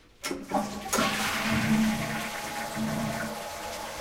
This sound is part of the sound creation that has to be done in the subject Sound Creation Lab in Pompeu Fabra university. It consists on a man flushing.
bath, bathroom, chain, flush, toilet, UPF-CS14, water